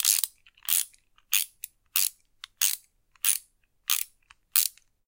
Ratchet Wrench Fast Multiple
A socket wrench ratcheted at a fast speed. 2 more variations of this sound can be found in the same pack "Tools". Those are at an average and slow speed.
changing, crank, cranking, mechanic, ratcheting, repair, tire, tool, worker, working